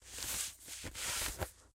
16 Cardboard Box Handling
cardboard, paper, box, foley, moving, scooting, handling,